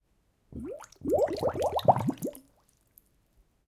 water bubbles 09
Water bubbles created with a glass.
bubbling, bubble, liquid